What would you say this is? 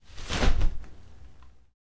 Open umbrella
open, rain, umbrella